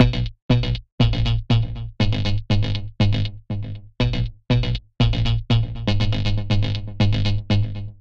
bass f e dd 120bpm delay-02
effect, synth, loop, dub-step, electronic, bass, dance, rave, electro, techno, compressed, trance, house, distorted, club, fx